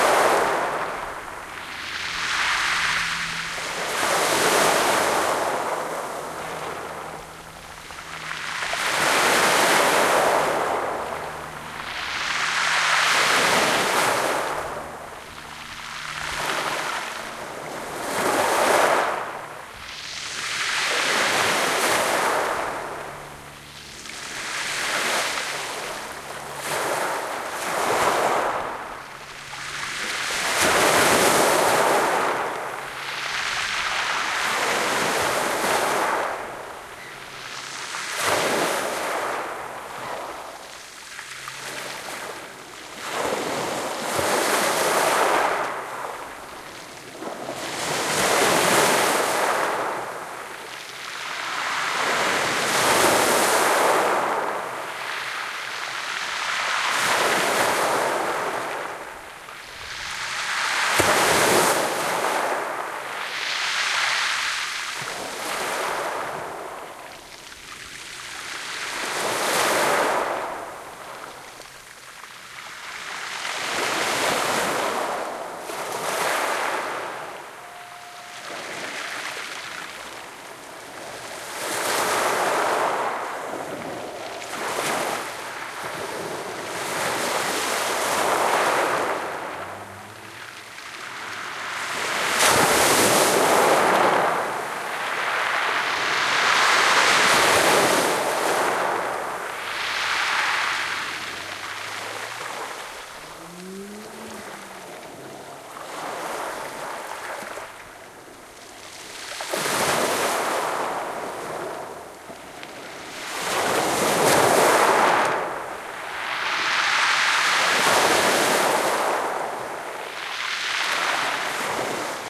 124-Portsmouth-Pebble-beach-2-minutes
Seaside recording at the Portsmouth beach April 2012, with an Olympus LS-10.
No filtering, no editing.
Just another day with Stout Games!
beach, field-recording, ocean, pebble-beach, pebbles, sea, stones, stout-games, water, waves